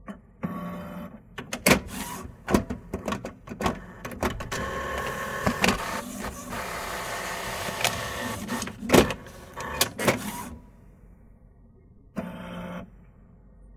HP Printer making some noises.